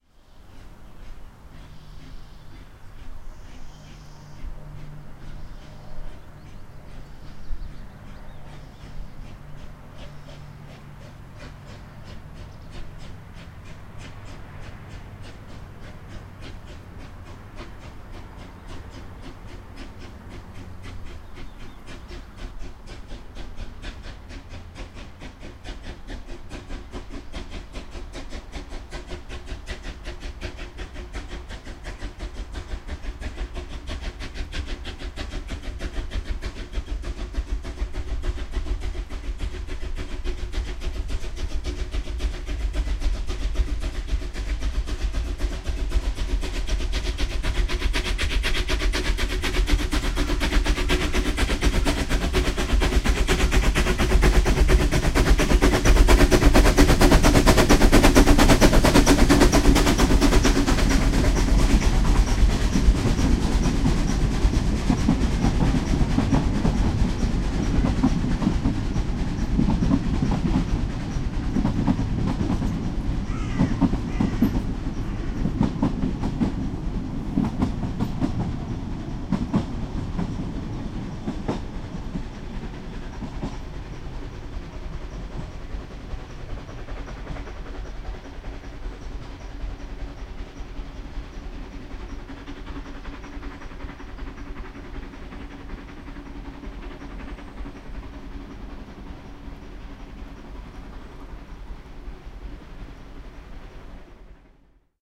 A stereo field recording of a narrow gauge steam train starting off uphill and it picks up speed as it gets closer. Recorded on a bend on the Ffestiniog Railway with a Zoom H2 on-board rear mics.